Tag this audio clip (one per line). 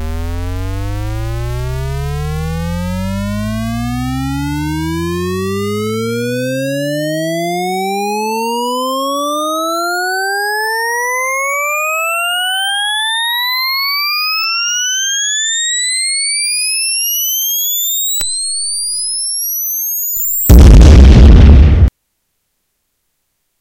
boom
buildup
mono
recording
stereo